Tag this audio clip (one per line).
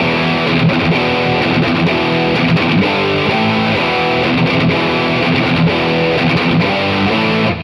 evil
guitar
metal
riff
yo